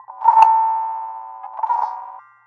a fisher price xylophone manipulated in sound forge